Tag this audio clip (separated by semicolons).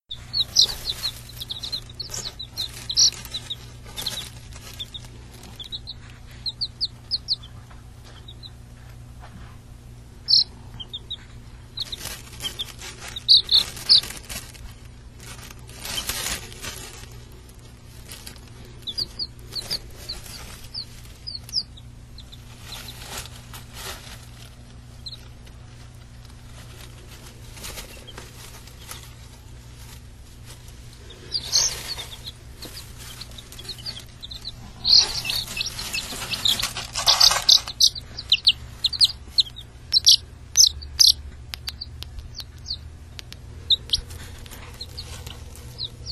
peeping; chirping; peep; chicken; chirp; chick